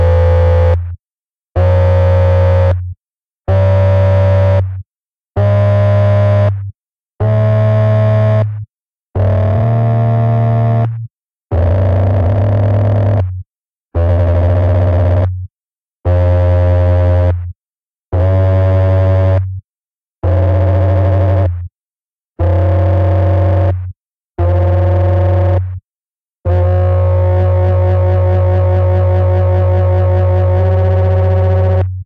Some more Monotron-Duo sounds.
Still using the VST Tracker by MDA of Smartelectronix to provide a sub-oscillator to add to the monotron sound.
Not all sounds with the sub-oscillator sounded nice. I thought about not uploading this set, as most of the sounds are not very musically pleasant. - Althought there are a few that are ok.
Suspect that in some cases, the VST plugin is not very sucessfull at correctly tracking the pitch of the monotron sound.
Again, the last sound on the set is very unstable and wobbles a lot.